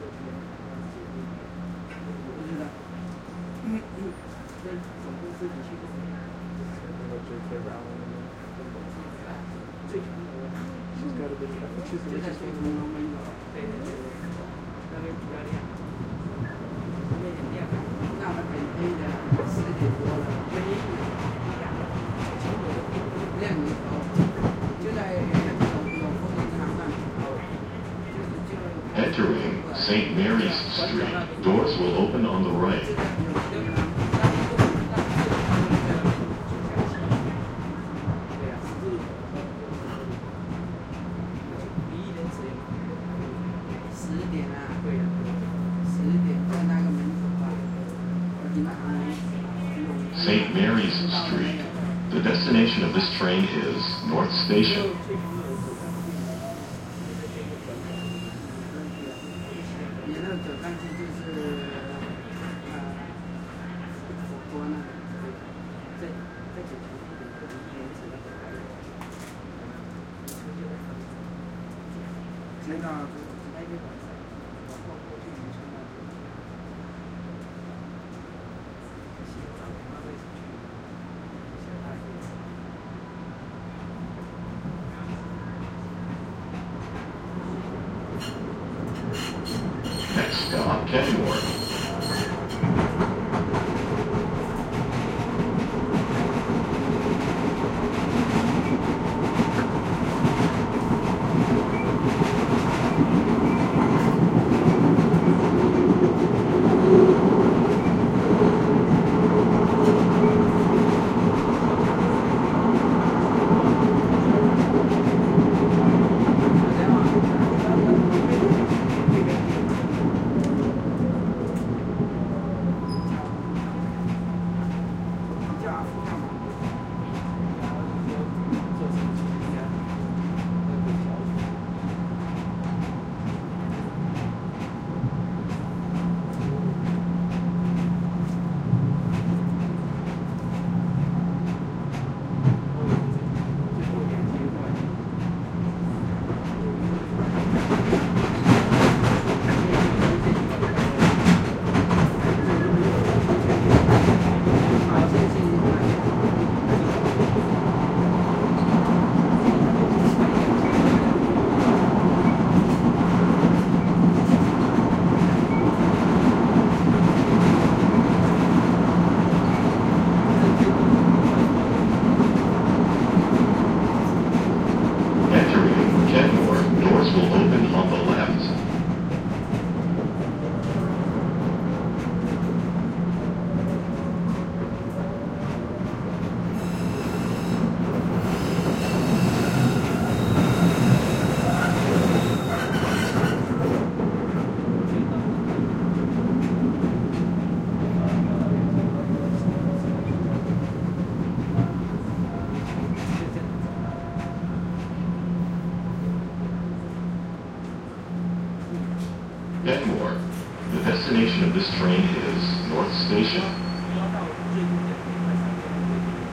Riding the Green Line T from St. Mary's Street to Kenmore.Recorded using 2 omni's spaced 1 foot apart.
boston, field-recording, mbta, stereo, subway, t, train